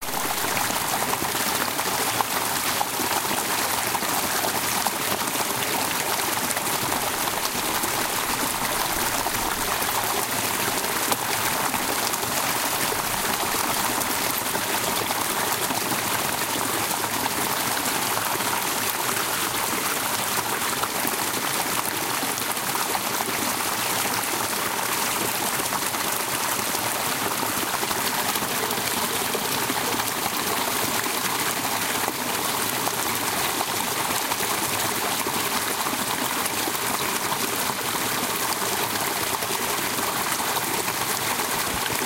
Recorded on a sunny day in southern Arizona hillside near Box Creek Cayon using a ZOOM 2